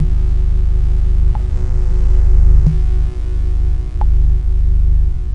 Weird FX Loop :: Drop and Bass Dark Atmos
Dark muffled bassy effect sound created with a no-input-mixing-desk controlled and modulated feedback "noise".
atmospheric, background, bass, dark, effect-sound, effectsound, FX, loop